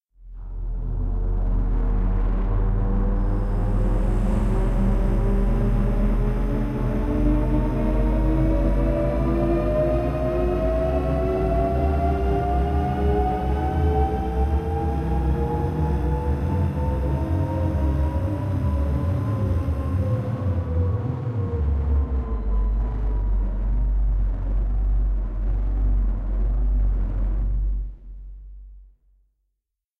The Ritual
a strange ritual
bizarre, converters, dreamlike, evil, psychedelic, ritual, technica, unearthly